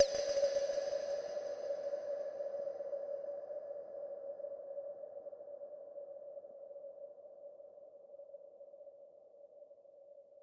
a bloop with reverb
reverb, bloop